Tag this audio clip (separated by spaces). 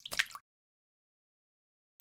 aqua aquatic bloop blop crash Drip Dripping Game Lake marine Movie pour pouring River Run Running Sea Slap Splash Water wave Wet